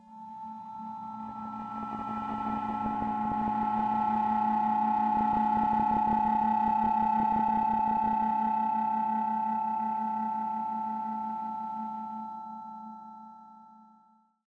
FOURNIER Jules 2019 2020 CrossingOfSpaceshipsInSpace
I created a sound which sound like a spaceship which intersects another spaceship in space.
I created 4 tones : 2 sinusoids and 2 squares smooth. 2 tones were low frequencies (220Hz) and the others 2 were high frequencies (880Hz).
After have mixed them, I used 5 times the affect reverberation with different settings each time to have a very special atmosphere on the sound.
I played with the "Size of the room", "Serious tones", "high-pitched tones" on the reverberation's settings.
Mouvement, Anxious, Crossing, Loneliness, Spaceship, Space